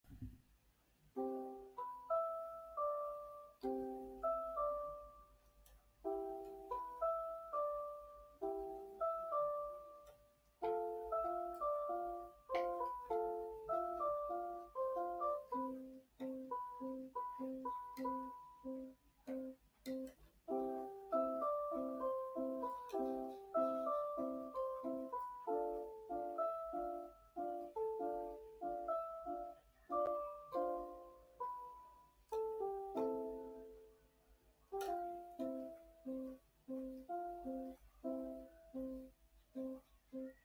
don't cry (nakuna) - Playing Piano
a little melody that I've recorded through my laptop